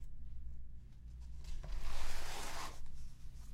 Drag book
Draging a book accros a table
Textbook, Pages